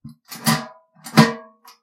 Safe Misc - 26
Sounds created from a metal safe/ vault
bank, cash, key, lock, money, padlock, safe, vault